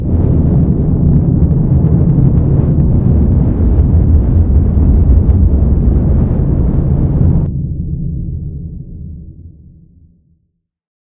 This sound was made in Adobe Audition by blowing on the mic then adding some distortion.
Thanks and enjoy!